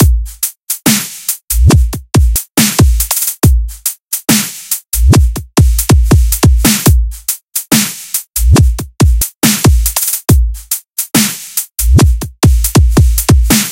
Dubstep Drums #3
Dubstep Drums 140BPM
Drums, 140BPM, Dubstep